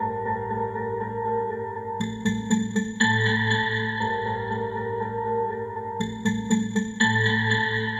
120 Distressed Break
A heavily processed sound that works good as a break
120bpm, metallic, loop, atmospheric, rhythmic, processed, electronic, break